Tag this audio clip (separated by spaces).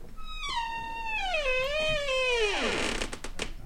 doors crackle